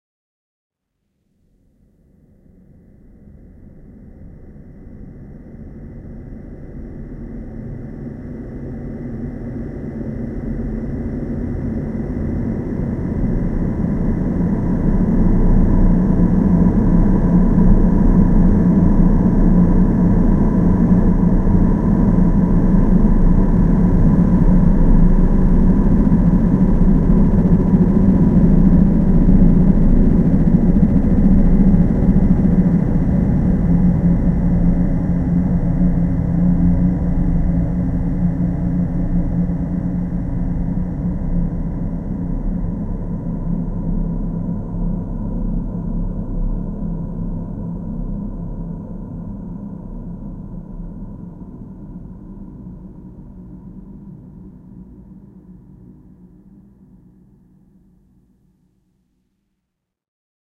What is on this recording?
About one and a half minute of beautiful soundescapism created with Etheric Fields v 1.1 from 2MGT. Enjoy!
Drone, Ambient, Electronic